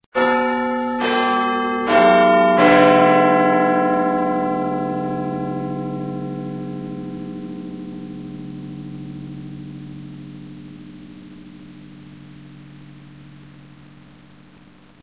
Big Ben QUARTER